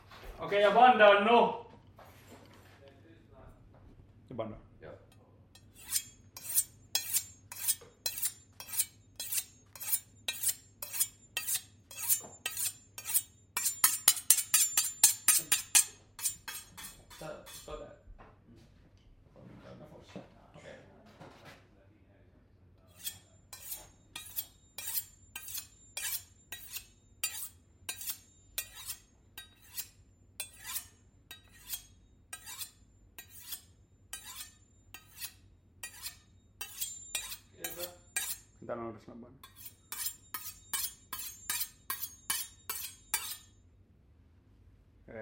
THE RATT24 1
My friend sharpening a knife at different speeds. Using a MKH60 and SoundDevices 744T HD recorder.
knife, sharpen, sharpening-knife, sharpen-knife